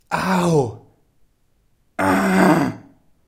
Desinfecting a wound, being overly dramatic about it. Recorded with a Zoom H2.